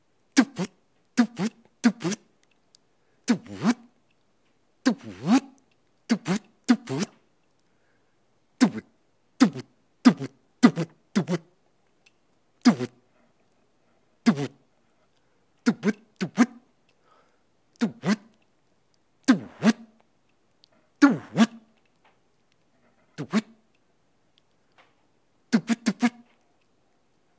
Some mediocre DJ scratch SFX - all done with my vocals, no processing.